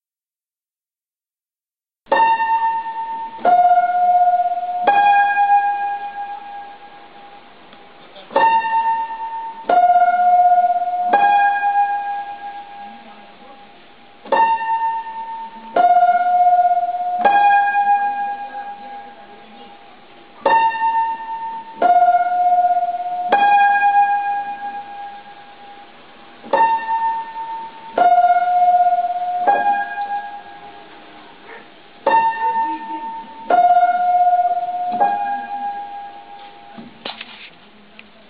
piano vell montseny3 P8170245 29-10-2010
the same than old piano 2 but with higher notes. Sometimes appears faint noises of people and a dog...
montseny3, old, piano